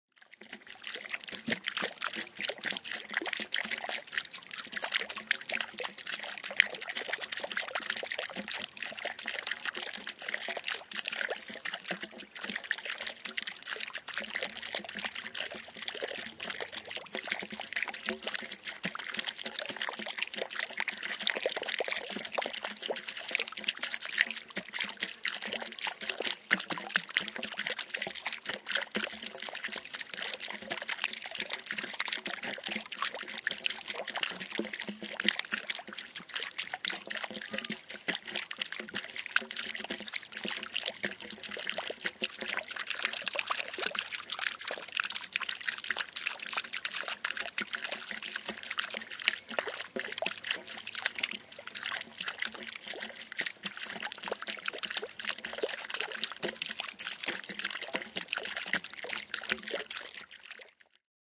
Fast, Irregular Dropping Water
Single Small Fountain recording, with Zoom H4